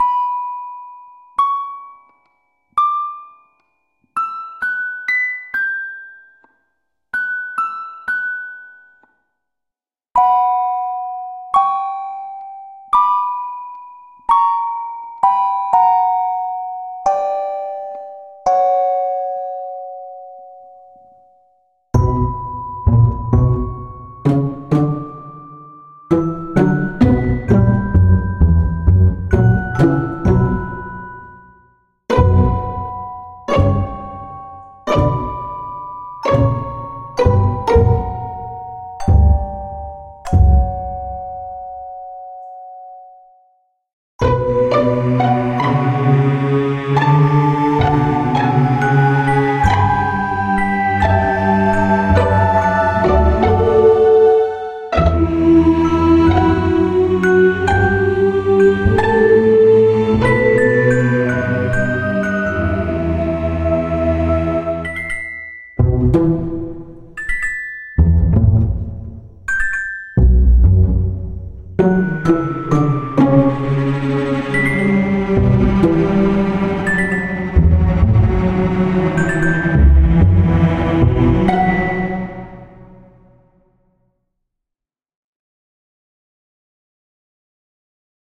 Suspense music
Here a little suspence music with one theme in three different form. The rythme of the last part is a little off, but you can use the other loops.
Btw, there was no mix or EQ in these loops
Hope you will enjoy
VST uses on FL sutdio 20:
LABS
mystery, SUSPENSEFUL, Suspence